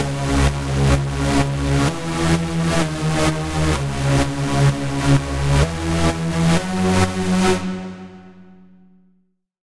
Sidechained synth made in Logic Pro 9. Sorry about that empty space at the end.